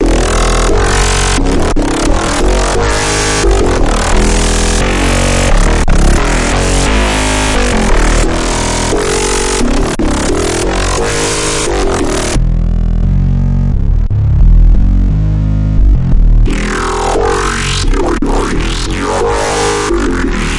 Growls 01 (Unfiltered
A little talking growl made in fm8, first 4 are the simple parts in Fm8, and number 5 in the sound is EQed.
dubstep, EQ, Fm8, growls, massive, talking